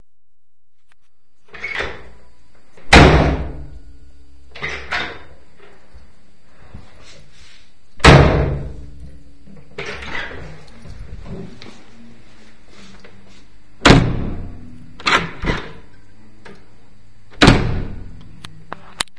door closed hardly
a, close, closing, door, doors, field-recording, handle, lock, open, popular, shut, slam, tag